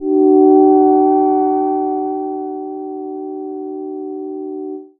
minimoog vibrating F#4
Short Minimoog slowly vibrating pad
short-pad, electronic, synth, pad